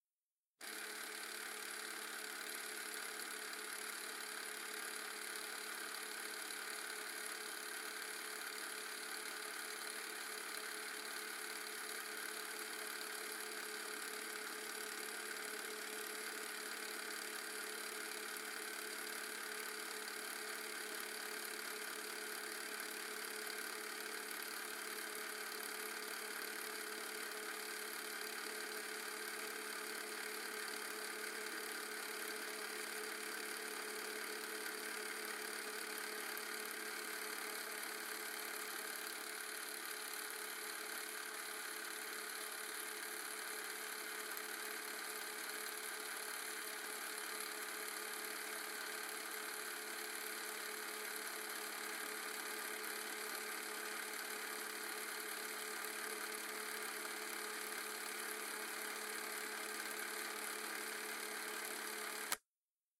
Motor noise of a Canon 310XL Super 8 Film Camera